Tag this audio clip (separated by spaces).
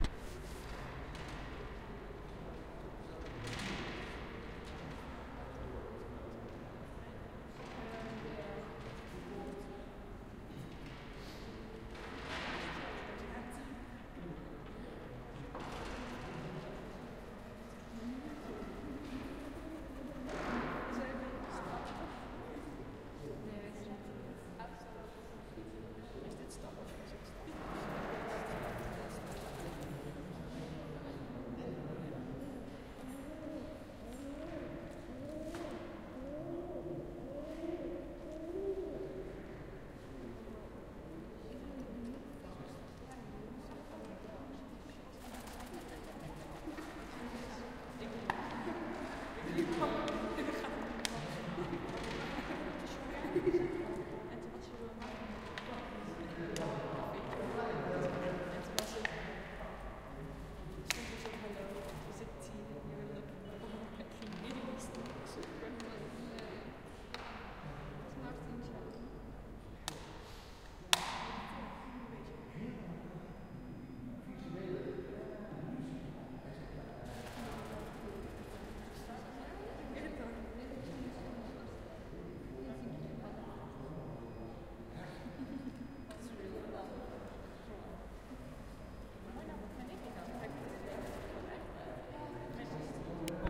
background door ambiance squeeking squeek